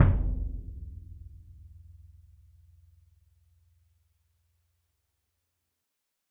Symphonic Concert Bass Drum Vel26
Ludwig 40'' x 18'' suspended concert bass drum, recorded via overhead mics in multiple velocities.
drum, bass, orchestral, concert, symphonic